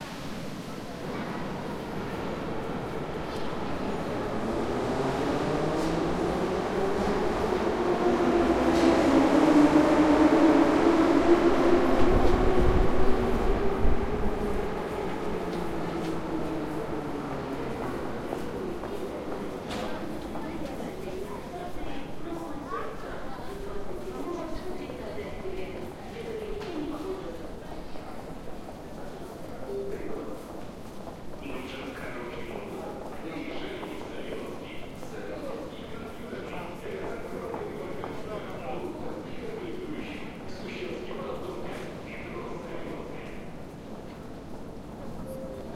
ambience, metro, wagon, city, Moscow
Moscow metro wagon ambience.
Recorded via Tascam DR-100mkII